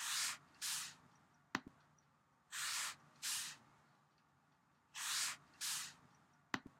Whipping of plastic bag recorded with a MacBook Pro